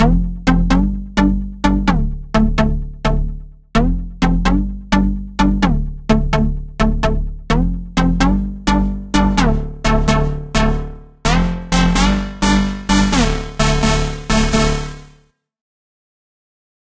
Dirty Lead Synth F (128 BPM)
Dirty Lead Synth house electro club F (128 BPM)
club, BPM, electro, Lead, Synth, F, Dirty, house, 128